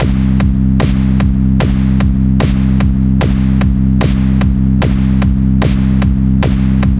FL Beat with tension
This beat can be used to build up suspense/tension.
tension, beat, suspense, climax